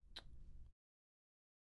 falling flower petal sound
forest, nature